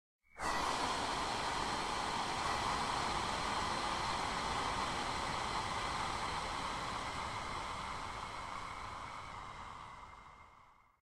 whisper inhalish2
Just some examples of processed breaths form pack "whispers, breath, wind". Extreme time-stretching (granular) and reverberation.
breath, noise, processed, steam, suspense